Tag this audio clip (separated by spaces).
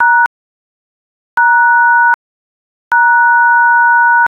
button dial dtmf key keypad sharp telephone tones